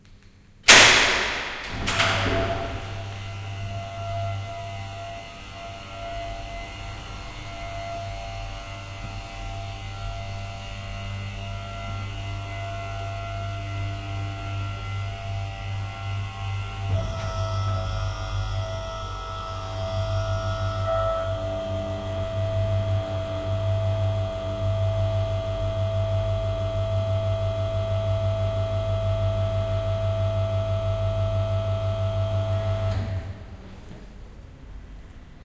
20061030.parking.door.bin
mechanism of a garage door in operation / puerta de garage abriendose